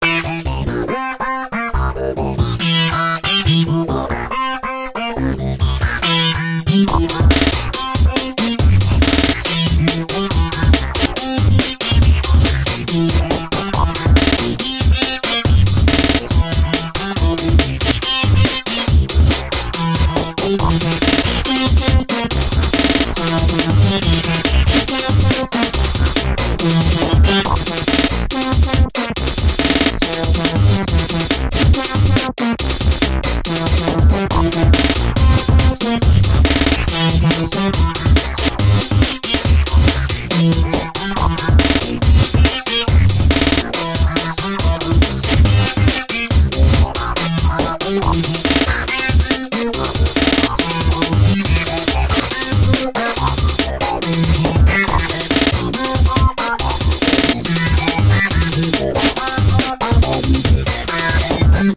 140 reaktor and moog
loop i created in reaktor and a moog vsti